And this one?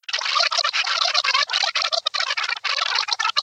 Rewind Voice
A simulation of a reel to reel tape recorder being rewound with the heads still in contact with the tape.
backwards, reel-to-reel, reversed, rewind, voice